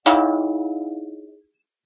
Thin bell ding 3
A short, thin bell chiming.
chime, ding, dong